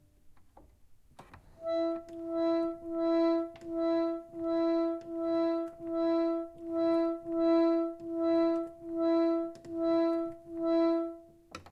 Pump Organ - Mid E
Recorded using a Zoom H4n and a Yamaha pump organ
e, e3, note, organ, pump, reed